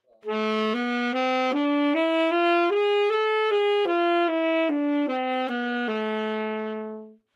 Sax Tenor - A minor
Part of the Good-sounds dataset of monophonic instrumental sounds.
instrument::sax_tenor
note::A
good-sounds-id::6256
mode::harmonic minor